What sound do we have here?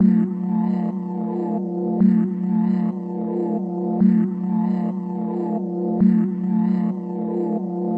Blue Peeww x4
My own vocals morphed in Cubase using vst's
Edited into loops or hits : 4 bars, sweeping, imminent, peewwee
loop, electronic, vocoder, morphed, vocal, sweeping, electro